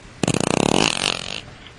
fart poot gas flatulence flatulation explosion noise weird
explosion, fart, flatulation, flatulence, gas, noise, poot, weird